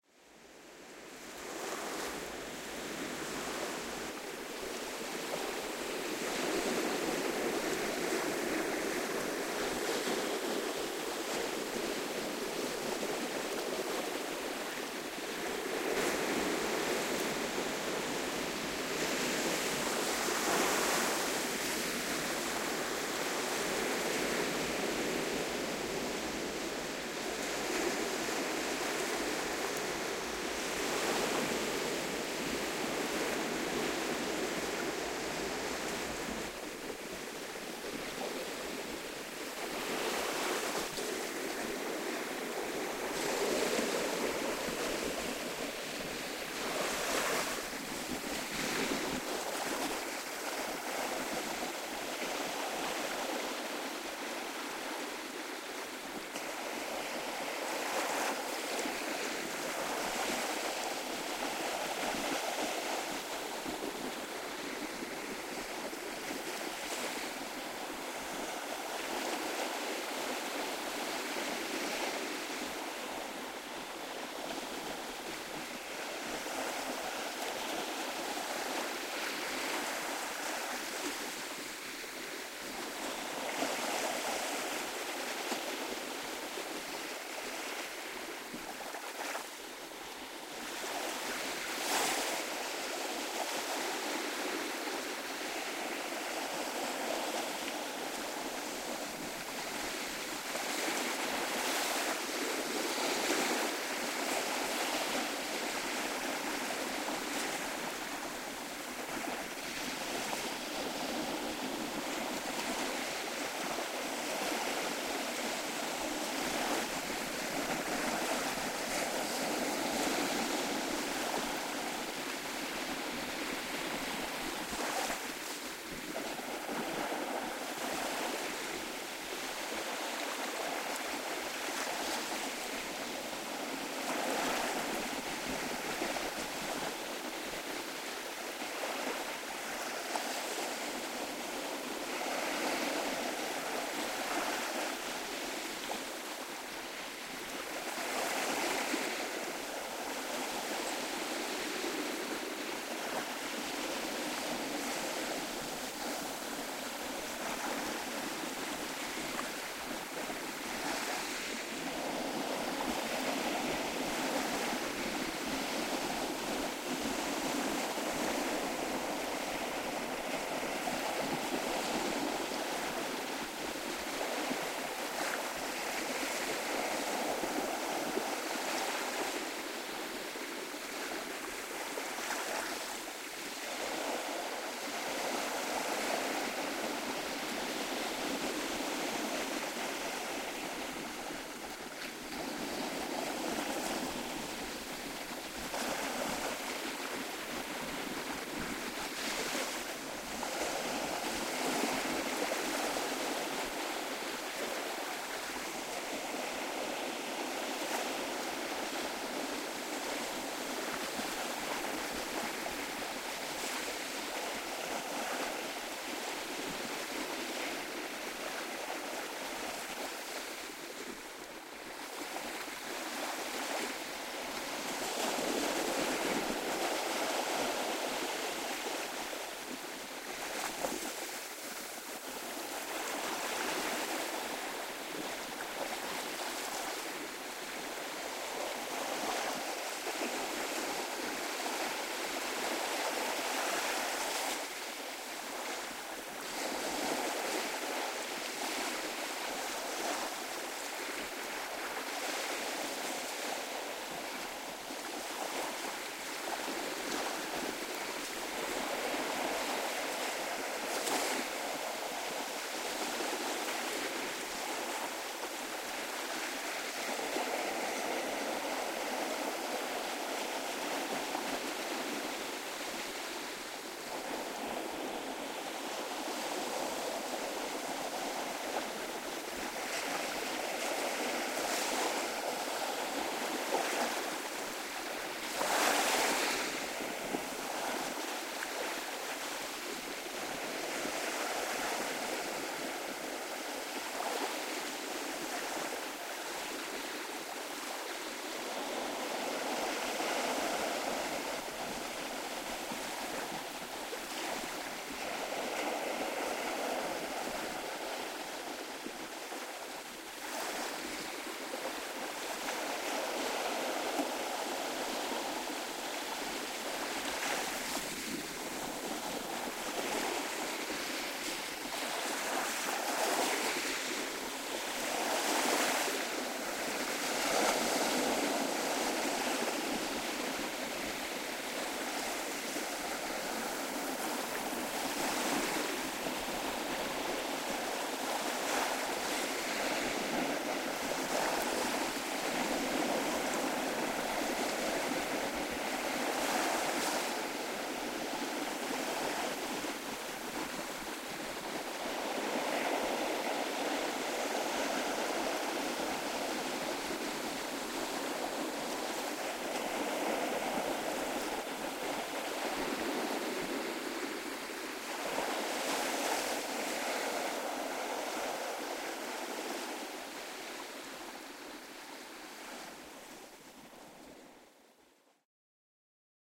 Hel(l) Sea!
Recording of the sound of the Baltic Sea taken on the 17th of April 2021 in Hel, Poland.
Warning! Due to the windy weather, the sound is scrapped sometimes.